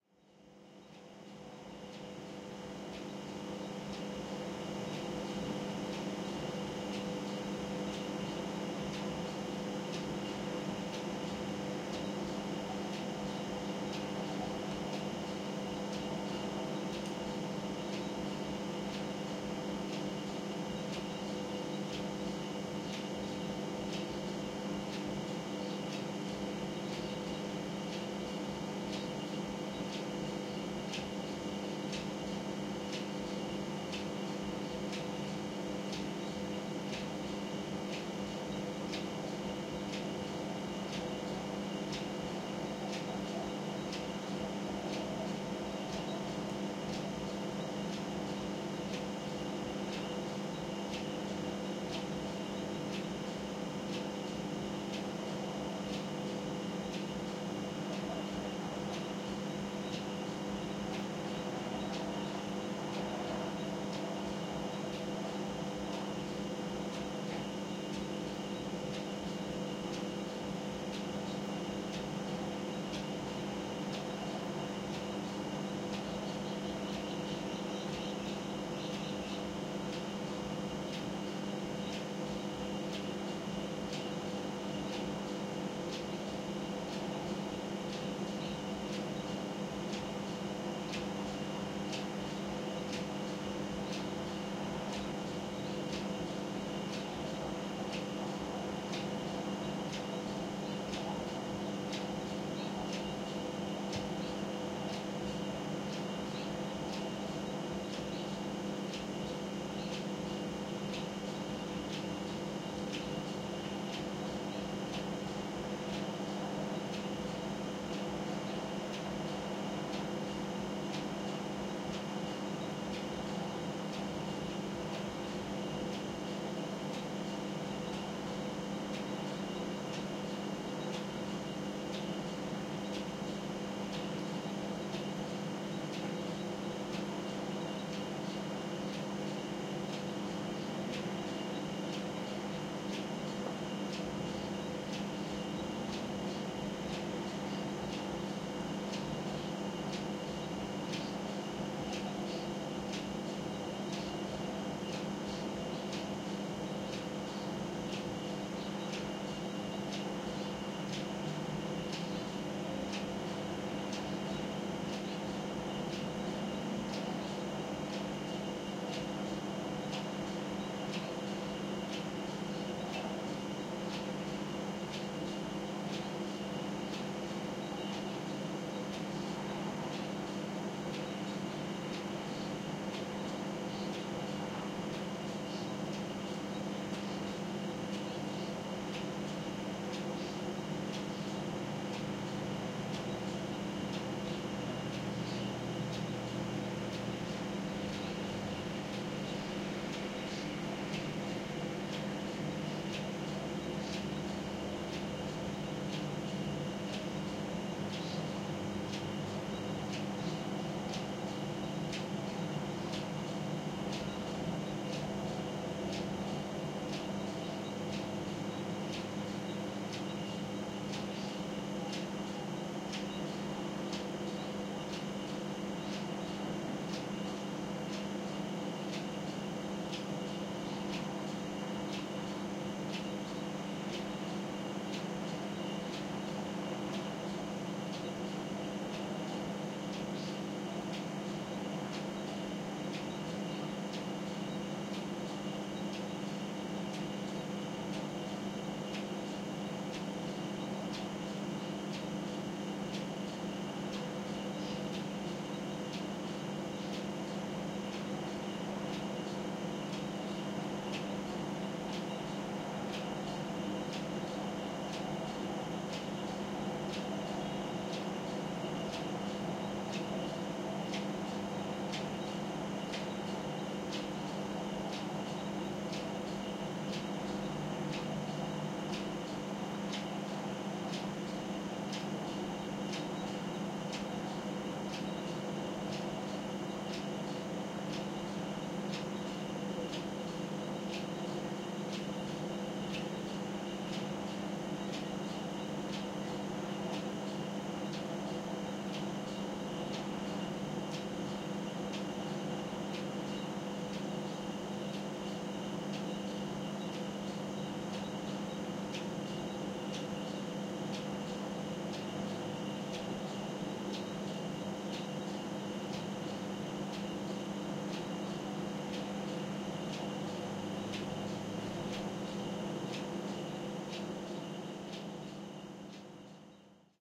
A clock and a fridge in a kitchen at night
A quiet(able) ambience (or roomtone) recording of a spacious kitchen next to a public road.
Recorded on a MixPre6 with LOM Usi Pro microphones in a binaural setup.
fridge, interior, kitchen, night, usi-pro